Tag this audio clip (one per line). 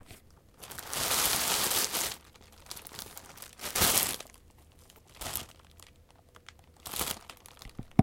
plastic
plasticbag